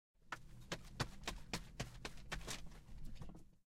Pasos ratón
a mouse wlaking in the grass
walk, mouse, farm